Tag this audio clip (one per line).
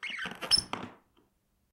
bend
bending
board
boards
creak
creakey
creaking
creaky
floor
floorboard
floors
foot
footstep
plank
squeak
squeakey
squeaking
squeaky
step
stepping
timber
walk
walking
wood
wooden